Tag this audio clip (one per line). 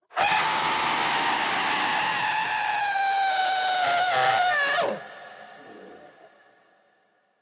agony
anger
banshee
cry
evil
ghost
haunted
haunting
monster
monstrous
pain
schrill
scream
screech
shriek
squeal
torment
woman
yell